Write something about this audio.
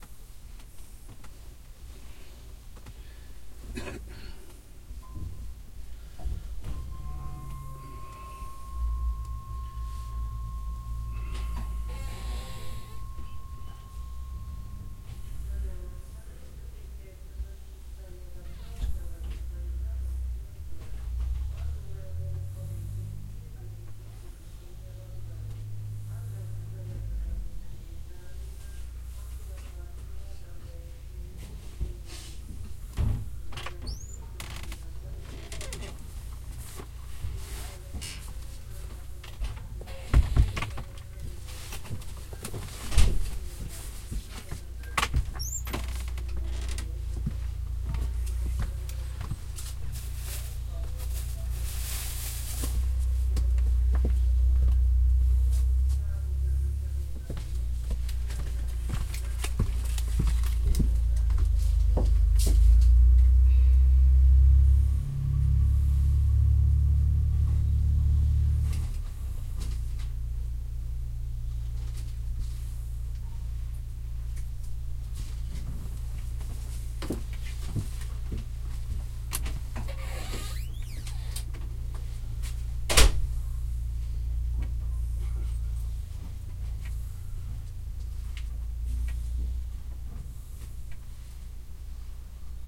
night station passenger wagon 1
The train was stopped on the station. Conductor creak and slam doors. The station announcements about trains. Low frequency rumble of track.
Recorded 30-03-2013.
XY-stereo.
Tascam DR-40, deadcat